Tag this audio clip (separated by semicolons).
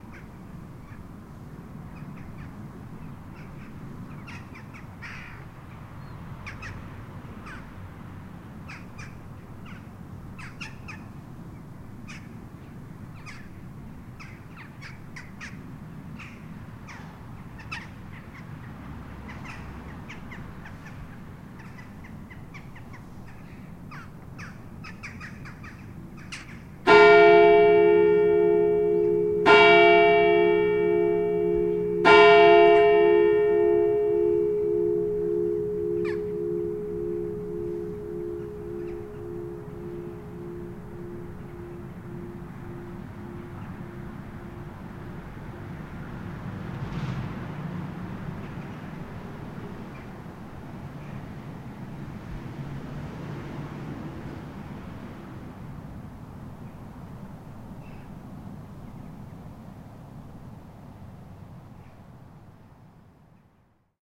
bell; birds; church; field-recording; Sint-Kruis-Winkel; Gent; graveyard; ambiance